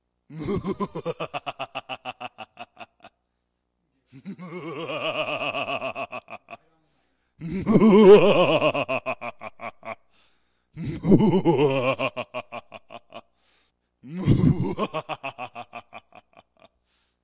evil-laugh-dry
I recorded my friend's excellent "muahahahaha"-style laugh. This file contains several takes, and is the original, with no effects applied.
evil-laugh, laugh, laughing, scary-laugh